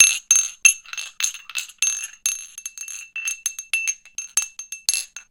Sword noises made from coat hangers, household cutlery and other weird objects.
Foley, War, Action, Fight, Battle